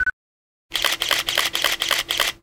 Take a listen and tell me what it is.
DSLR Camera Shots
Colleague shooting photos with a DSLR camera.
Recorded with a Zoom H2.
camera, click, digital, dslr, model, paparazzi, photo-camera, photography, shutter, slr